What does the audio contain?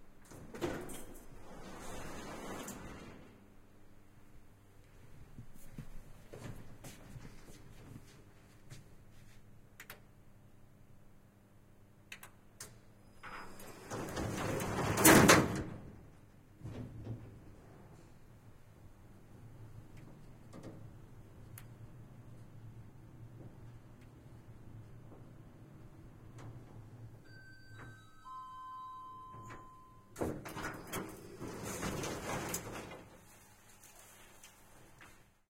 hotel jugoslavia lift belgrad sebia
converted it to l/r
lift, doors, close-up, uplift, elevator, closing, metallic, opening